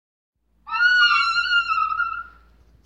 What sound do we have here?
Creepy sound 1* , by FURRY
This sound was created when washing the window :D
Creepy, Horror, Psycho, Scary